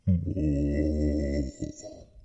Zombie moan 1
creepy, gargle, ghoul, growl, hiss, horror, moan, moaning, monster, roar, snarl, undead, zombie